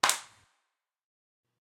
Clap with small reverb
buttchicks, Clap, clean, field, recording, reverb, sample